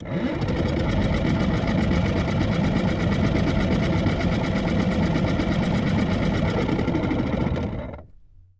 volodya motor 2
The heater in an old Volvo station wagon spins up, runs, and stops. It's very pronounced and, well, broken. Recorded in September 2010 with a Zoom H4. No processing added.
heater, motor, spin, whine